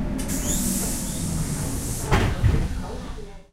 S-bahn train door opening. Recorded Sept 3 2018 in Berlin, DE with Zoom H4N.
open, berlin, platform, opening, bahn, german, Door, station, Train